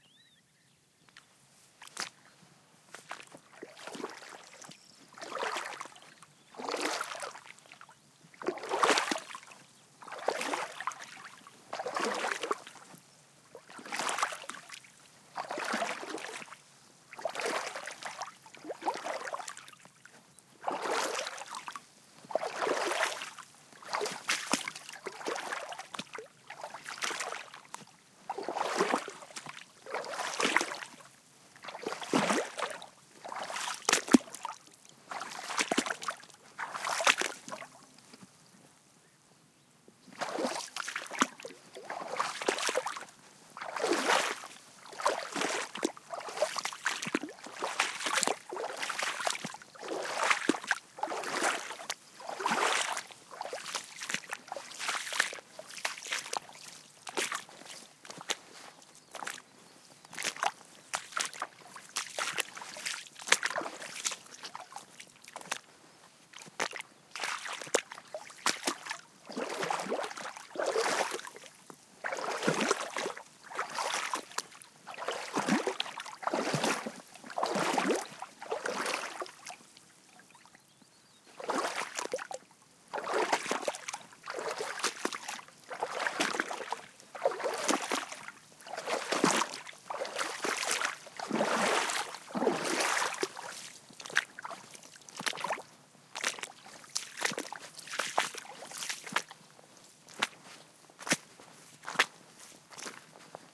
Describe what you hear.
wading a shallow pond / vadeando una laguna poco profunda
field-recording, nature, pond, water